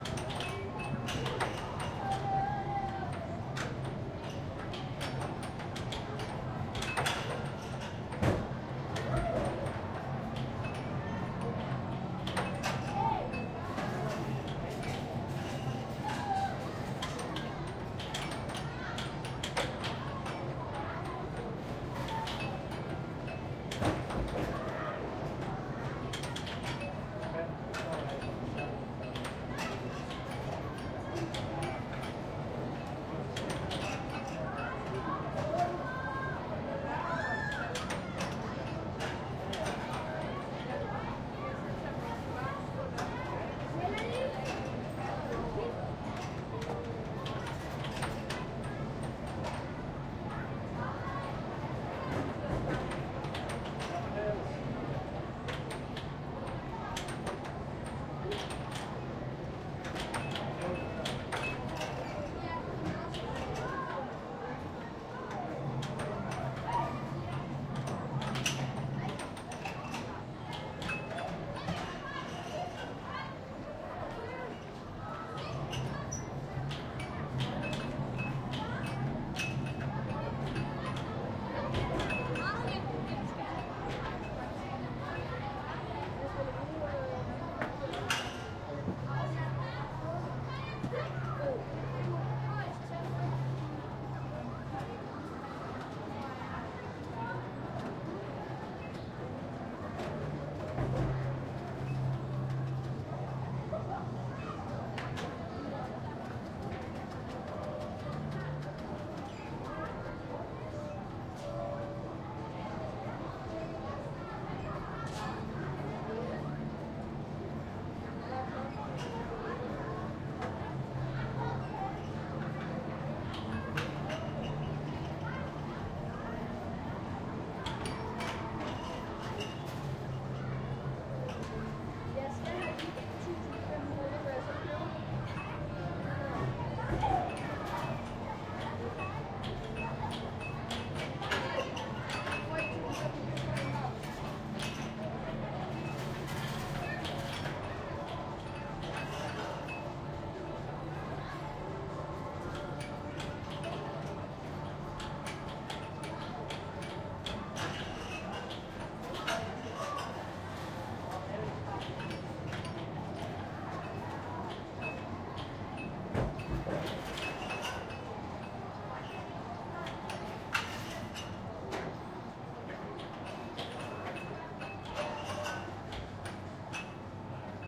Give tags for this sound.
air
ding
laugh
slot-machine
kids
air-hockey
Zoom-H2
talk
hockey
playground
games
machines
chatter
Herning
play
game
scream
indoor
laughter
machine
happy
Denmark
yelling
Dk
people
kid
slotmachine
inside

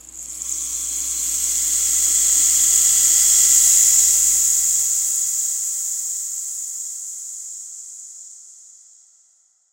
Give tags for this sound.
percussion
rain
rainstick
shaker
sound-effect

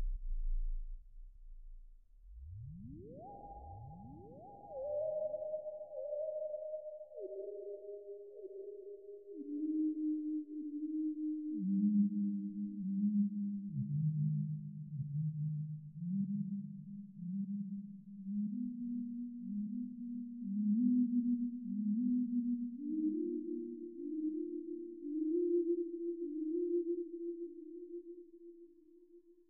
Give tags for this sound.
sci
fi

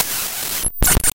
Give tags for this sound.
glitch
noise
raw-data

